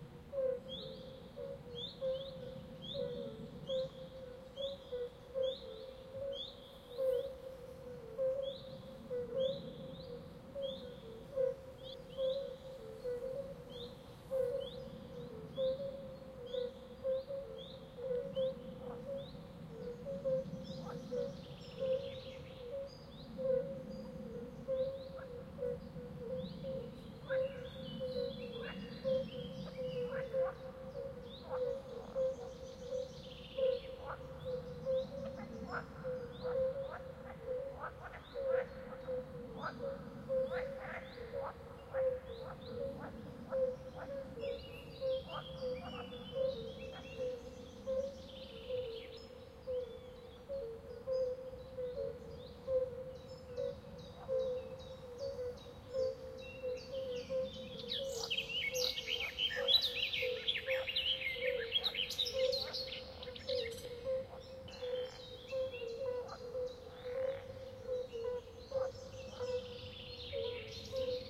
Field recording of forest swamp. Sounds of frogs, birds. The main sound is coming from the Bombina Bombina frog.
animal, anxious, frog, atmosphere, enchanted, forest, terrifying